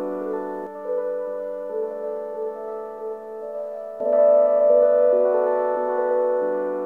Micron Hi Tone 3
Alesis Micron Stuff, The Hi Tones are Kewl.
micron, electro, leftfield, beats, alesis, base, synth, kat, ambient, bass, chords, glitch, acid, idm